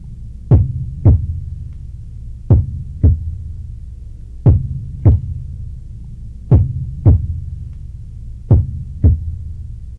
ZERILLO Alexandre 2015 2016 GiantHeartBeating
Sound based on a simulated heartbeat with my throat. I slowed it down and low pitched it.
Typologie selon Schaeffer :
Itération complexe.
Masse : groupe nodal.
Timbre terne, brut.
Grain brut.
Pas de vibrato.
Dynamique abrupte, doux
Variation Scalaire.
Ste